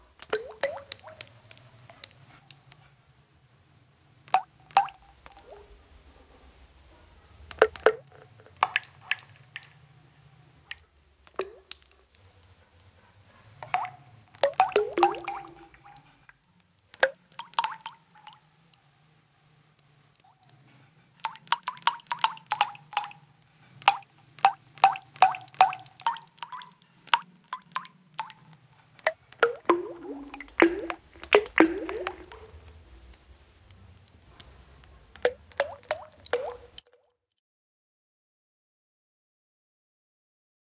A synthesised sound of water drops with reverb.
water, atmosphere, processed, drips, ambience, electronic, drops, synth